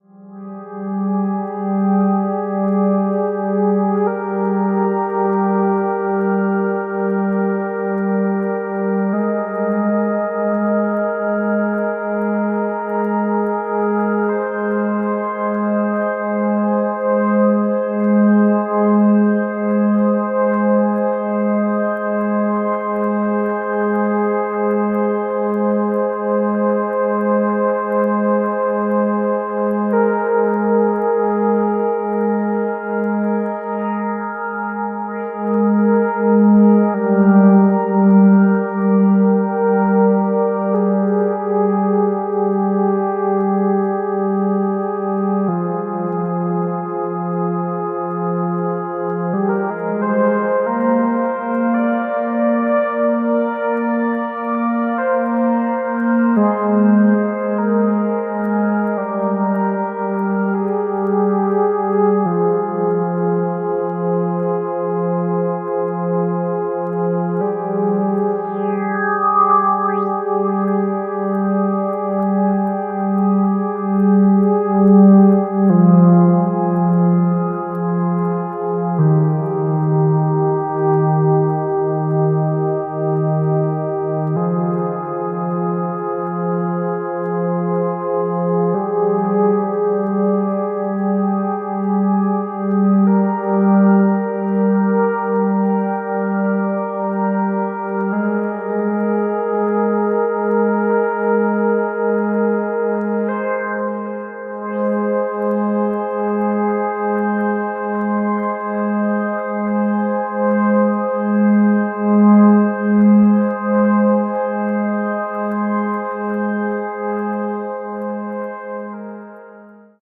1.This sample is part of the "Padrones" sample pack. 2 minutes of pure ambient droning soundscape. This padrone is a bit more drone like than the other ones. Slow evolving melodies.